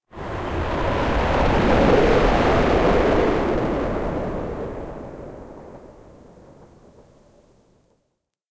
Powerful Starship Rocket Flyby
A powerful multi-engine starship flying by.
airplane, distorted, engine, flyby, powerful, rocket, ship, spaceship, starship, thrusters